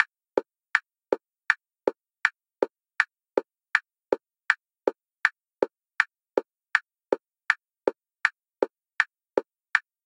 RACCA GUILLAUME 2019 2020 turnsignalblinking
This song has been created from generating rythmth track on Audacity. I set the tempo at 160 pbm, 2 beats ber par for 70 bars. I took the metronom tick and I set the MIDI pitch strong beat at 58 and the MIDI pitch weak beat at 58 too.
sounds, blinking, turn, car, signal